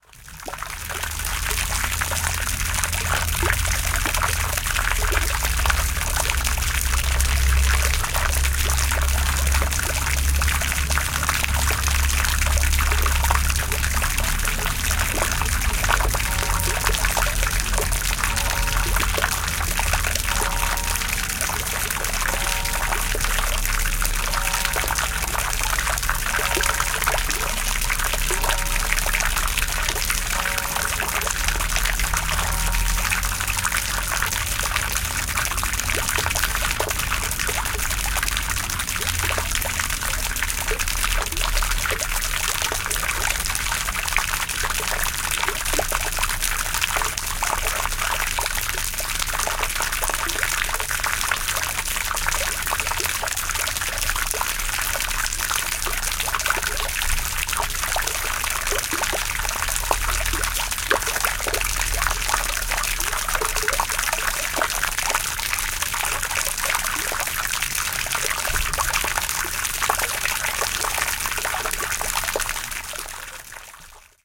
A fountain in a park, the bell of a distant church is ringing. Recorded with an Olympus LS-14.